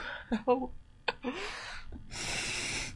A REAL small laugh. During a recording, I started laughing for a stupid reason, and ruined the recording. but one good thing that came out of it, was the laugh. I have the full version of the recording saved somewhere(I know where), but I do not believe it is that great. I decided to cut the beginning of the recording, leaving only the laugh.
real-laugh, laugh, laughing